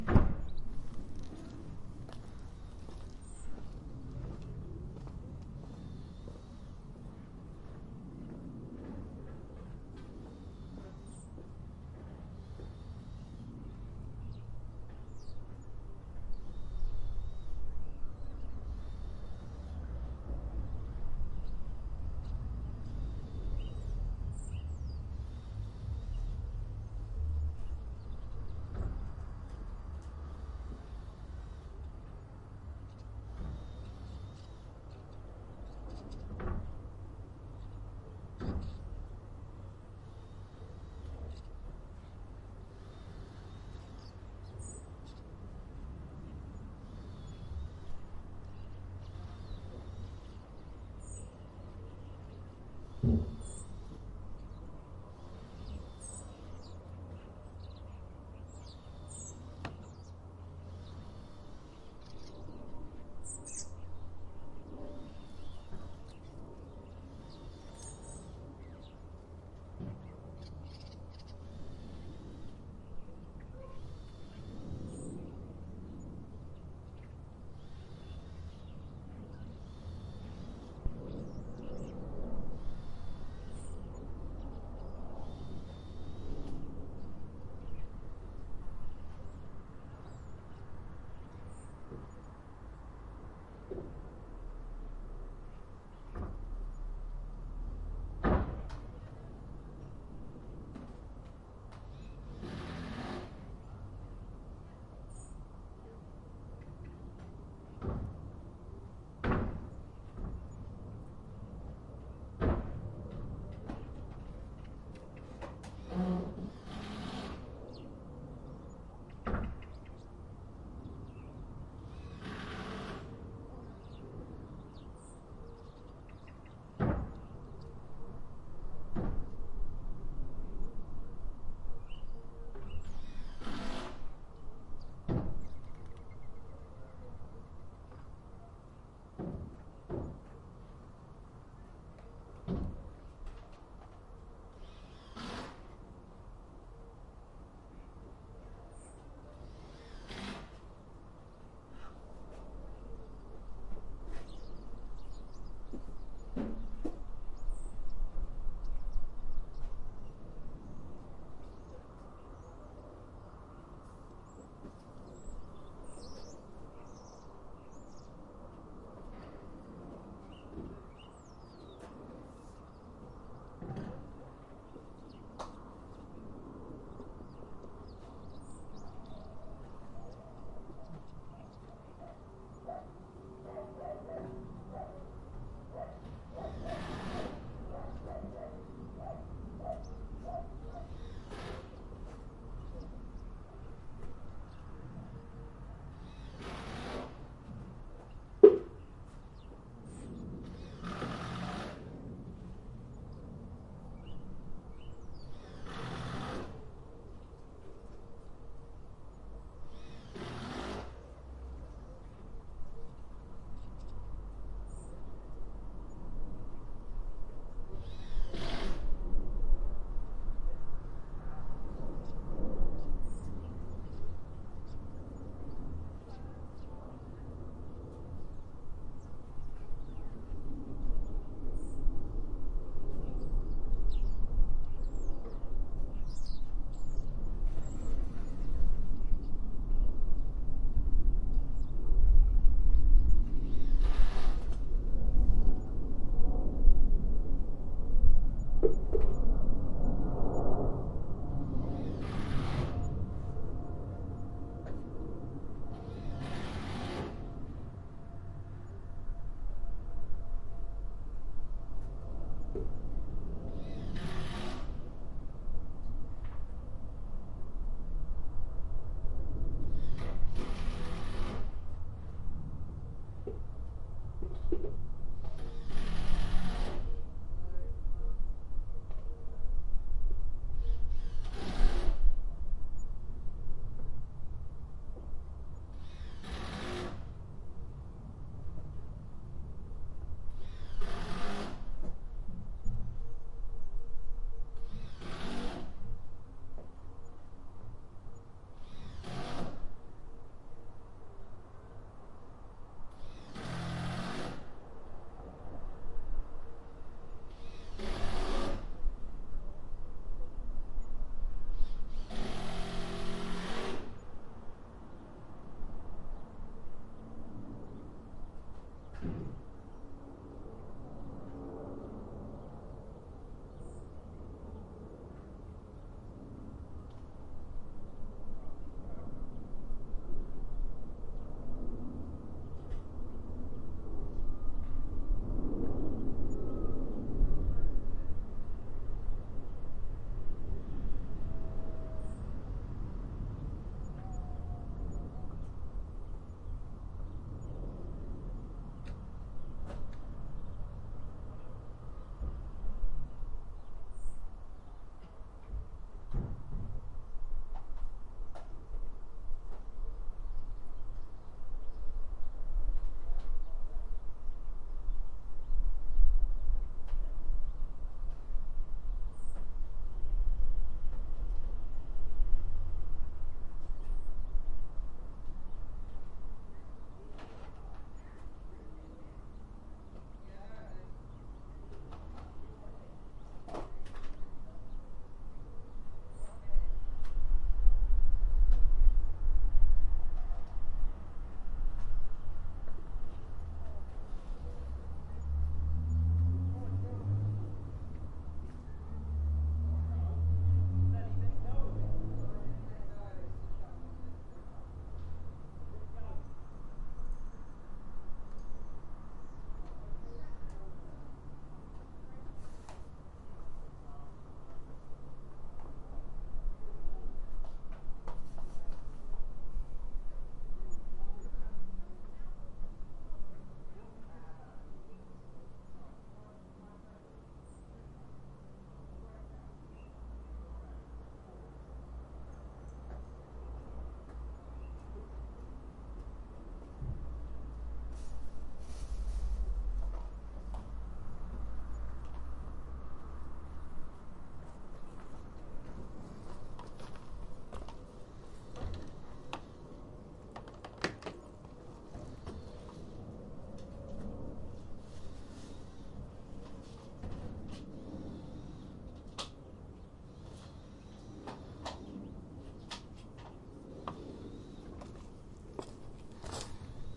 Back Neighbour construction 04-Oct-2015 004
Quieter than previous recordings on the same pack. The first part has mostly low level construction sounds and the birds, airplane noise and distant traffic are the main sounds. Some footstep sounds made by me walking around in my garden.
From about 1min45s the sounds of electric screwdriver noise start, also a lot of what seems to be moving timber pieces.
Distant voices from the builders towards the end of the recording.
building, construction, electric-screwdriver, wood